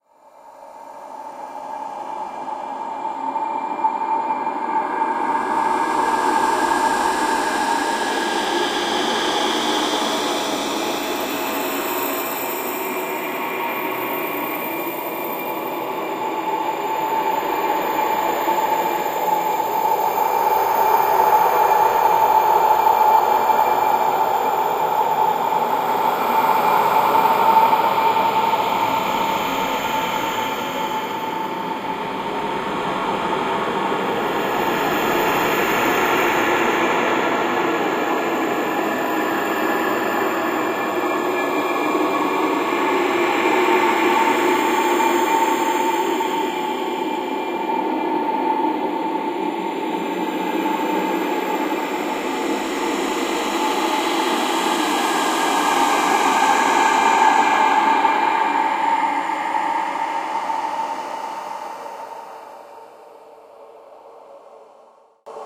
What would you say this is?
Glassy Atmosphere
I said it'd sound nice with reverb didn't I?
ambiance, cinematic, ambience, ambient, glass